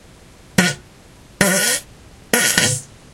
toilet fart11
fart, flatulate, flatulation, flatulence, poot